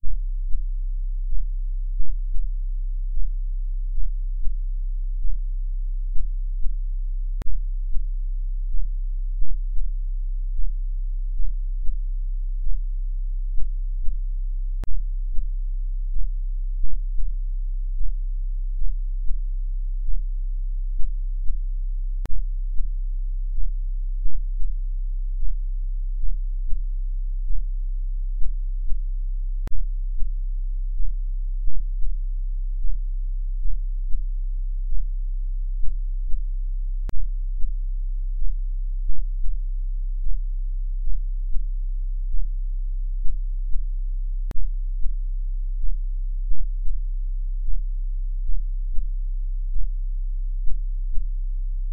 Deep Bass (Increase volume)
Simple sub bass.
Thanks!